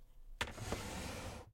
A drawer being opened on an antique desk.